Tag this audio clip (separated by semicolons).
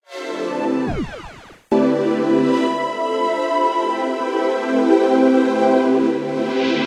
fx; pad; synth